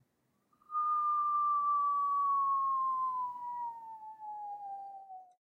Whistling sound of cat falling from a plane.